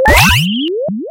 8-bit retro chipsound chip 8bit chiptune powerup video-game